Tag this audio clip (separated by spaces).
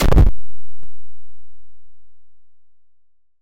click drop locked menu